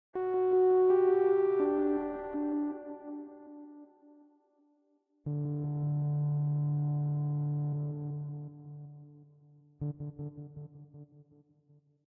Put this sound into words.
pl-organlike-acidinside
Something I synthesized around yesterday. Turns out, sawtooth makes an excellent organ-like sound when filtered into oblivion.
It sounds rough because I used that acid sample I'm trying to destroy completely. Wave files degrade fast, right?
experiment, acidthingy